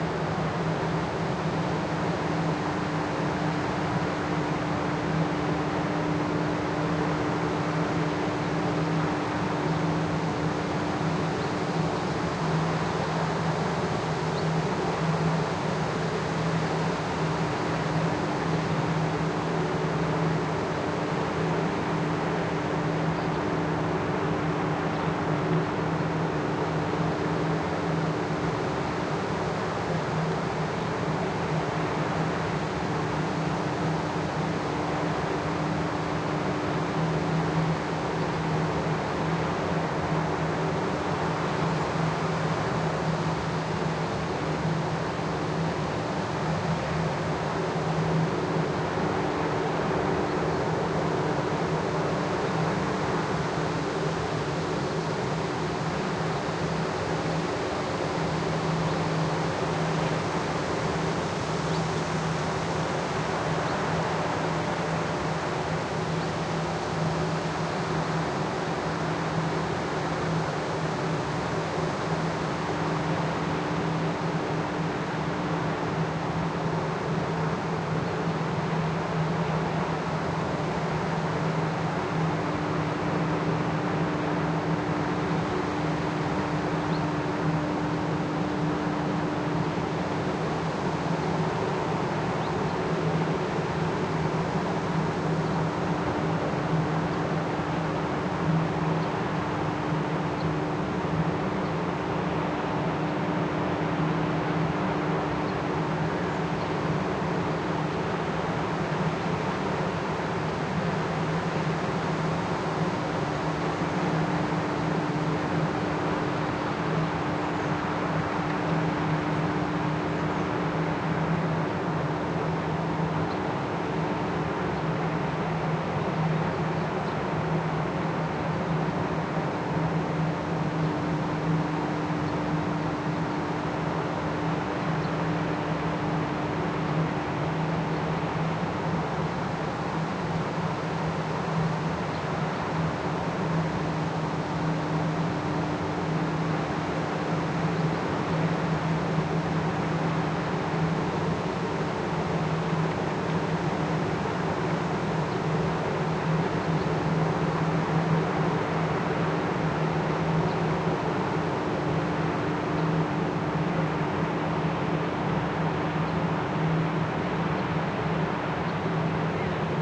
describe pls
ambience hydroelectric power station Donau Greifenstein
Ambience recording near the Greifenstein hydroelectric power station at the Donau river, Niederösterreich, Austria.
Recorded with a Fostex FR2-LE recorder and a Rode NT4 stereo mic.
field-recording, river, station, fostex, facility, power, austria, ambient, sterreich, rode, hydroelectric, atmos, atmosphere, nt4, fr2-le, donau, ambience, plant